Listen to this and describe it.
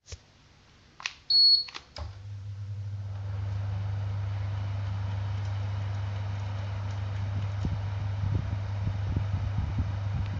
air conditioner turn on
turning on an air conditioner
air, cooler, machine, noise, turn